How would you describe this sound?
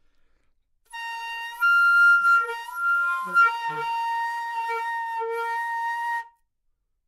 Part of the Good-sounds dataset of monophonic instrumental sounds.
instrument::flute
note::F
octave::5
midi note::65
good-sounds-id::3185
Intentionally played as an example of bad-richness